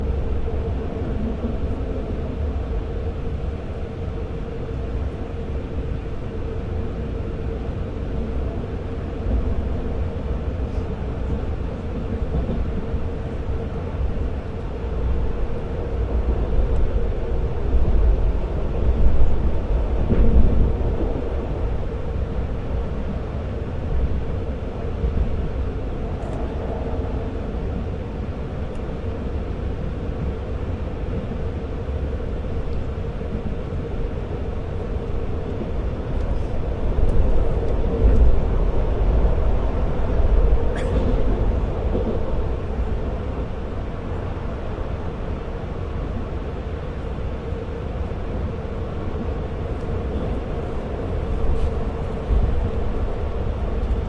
Inside a train 2
Ambience of a train travelling.
{"fr":"Intérieur d'un train 2","desc":"Ambiance de l'intérieur d'un RER. ","tags":"train rer ambience intérieur"}
ambience, inside, rer, train